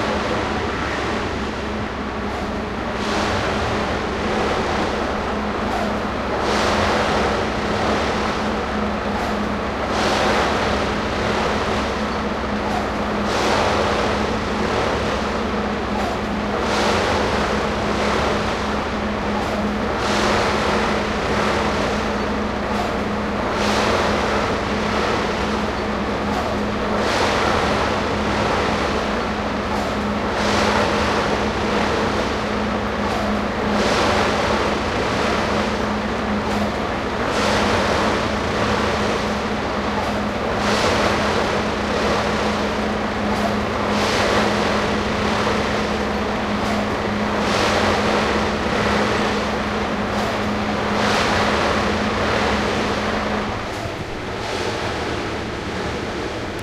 RingbahnWirdRepariert Sound4
field recording construction side train track bed industrial agressive massive hard
agressive, hard, industrial, massive